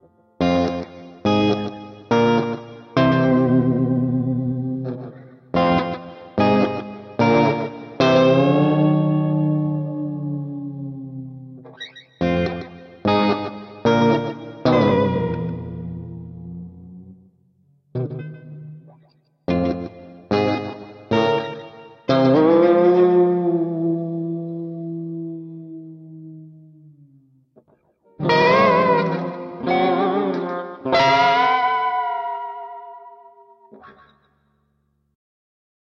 Number 89 Floyd Filtertron F Screams
Heavy reverb surf rock type of sound but with a pitch shift type of effect to really play up the vibrato/tremolo. Sorta slow and moody but trippy as well.